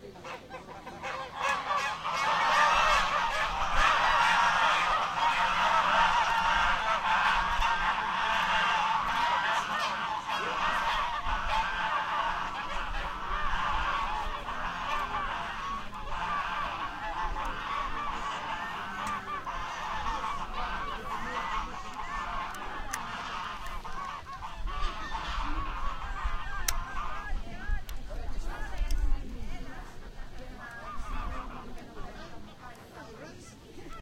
Geese call for food. Greek elderlies' thermal sPA, near the Volvi lake.
Device: ZOOM H2 Recorder.
I made some corrections in Adobe Audition 3.0. Enjoy!